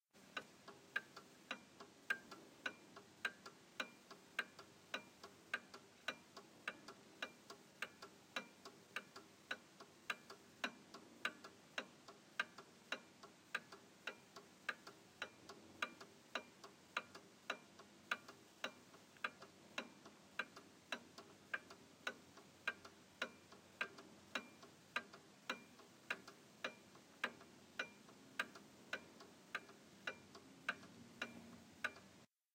antique clock tick 2
My grandfather's antique Seth Thomas mantle clock.